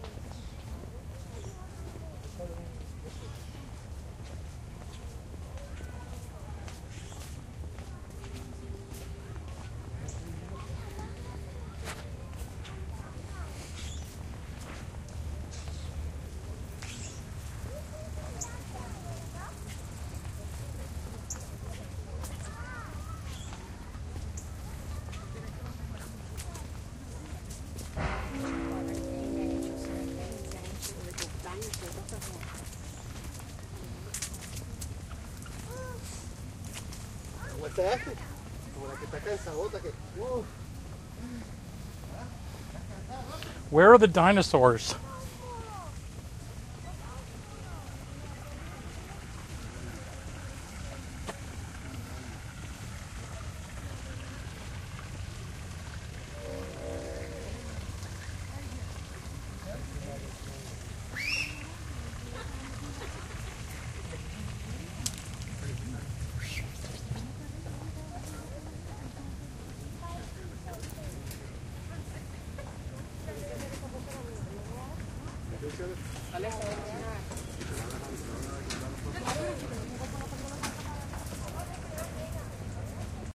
zoo searchingfordinosaurs
Walking through the Miami Metro Zoo with Olympus DS-40 and Sony ECMDS70P. Walking through the zoo looking for the dinosaur exhibit.
animals, field-recording, zoo